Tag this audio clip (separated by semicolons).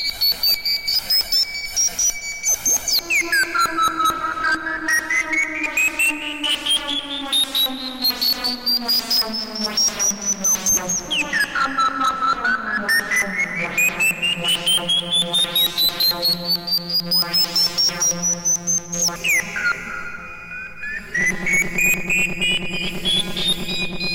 2; Cloudlab-200t-V1; Buchla-200-and-200e-modular-system; Buchla; Reaktor-6